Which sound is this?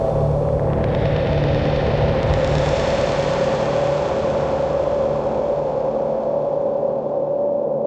ambient 0003 1-Audio-Bunt 9
ambient, breakcore, bunt, digital, DNB, drill, electronic, glitch, harsh, lesson, lo-fi, loop, noise, NoizDumpster, rekombinacje, space, square-wave, synthesized, synth-percussion, tracker, VST